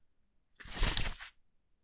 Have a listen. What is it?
Page Turn - 27
Turning a page of a book
book, books, flick, flip, flipping, library, newspaper, page, pages, paper, read, reading, swoosh, turn, turning, whoosh, woosh